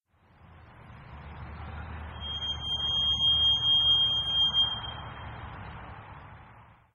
Squeaky brakes on delivery truck
squeak, vehicle, brakes